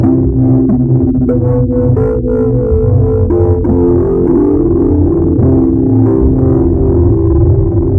Nord Lead 2 - 2nd Dump
idm, resonant, blip, nord